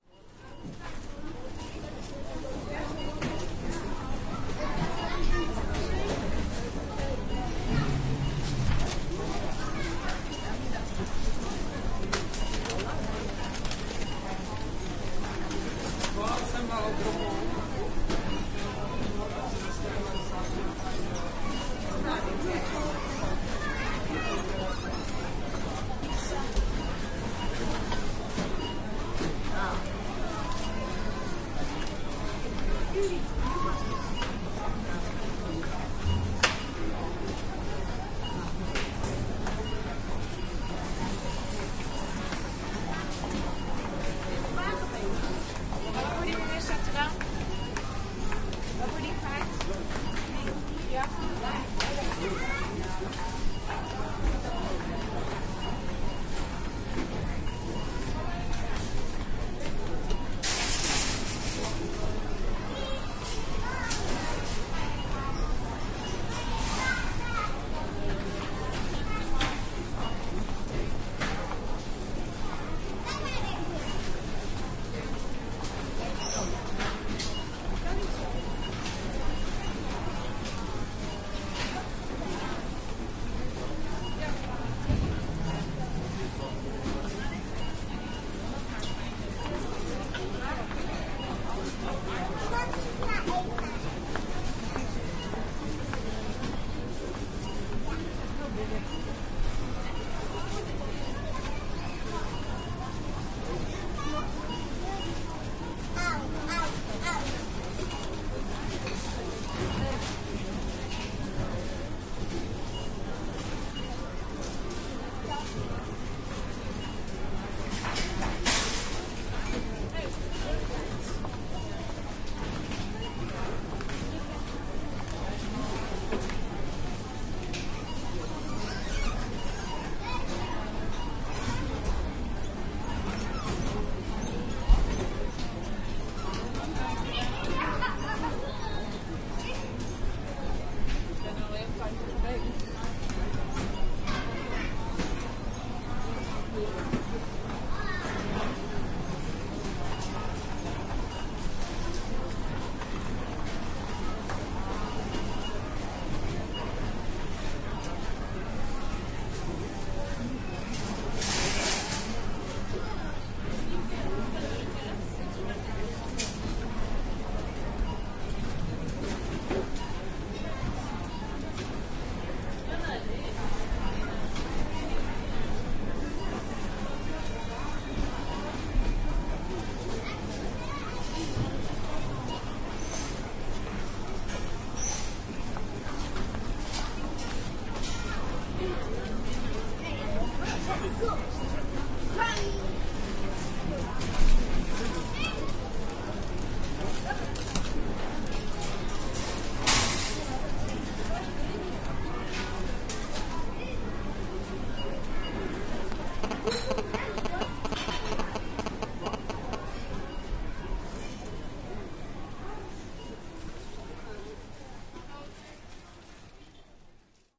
Supermarkt AB1
Super de boer recorded atmosphere, den haag Netherlands MegaStores. recorded with DPA miniature AB 39 centimeter appart. with Nagra VI
supermarket, super, ab, atmo, atmosphere